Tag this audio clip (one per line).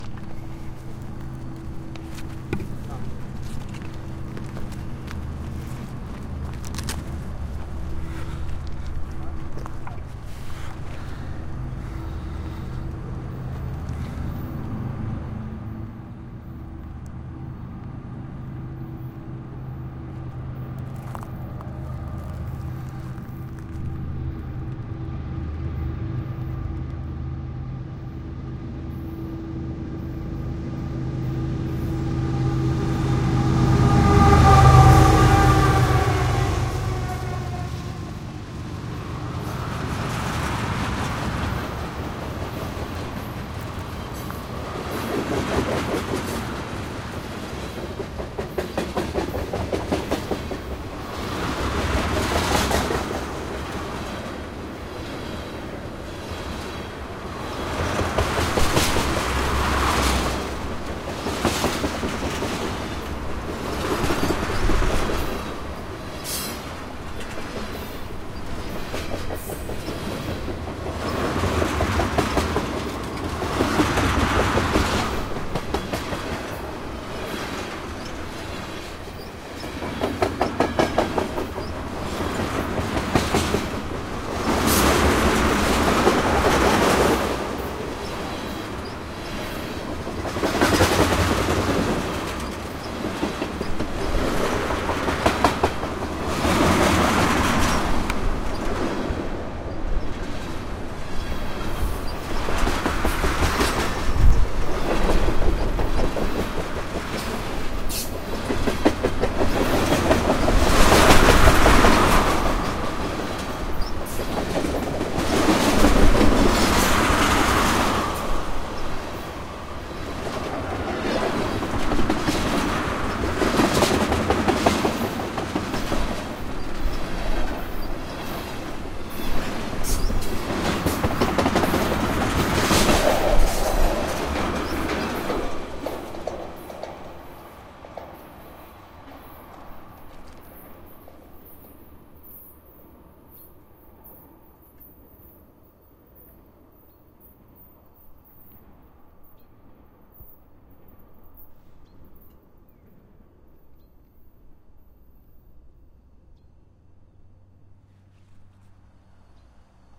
Fast
Freight
Pass
Red
Train